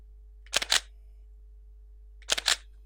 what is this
DSLR shutter release sound. Pentax K100D. Recorded on an old desktop microphone; background noise removed.
camera, pentax